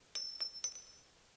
moneda moneda1 moneda3
moneda, moneda3, moneda1